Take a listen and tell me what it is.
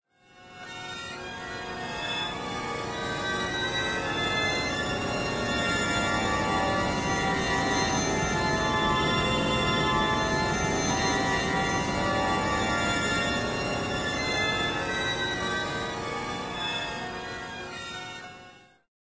string quartet stack1
atmosphere, cluster, dark, drone, fx, horror, meditation, processed, quartet, slow, string, suspense